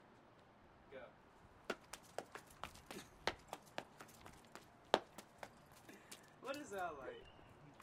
Footsteps up and down concrete stairs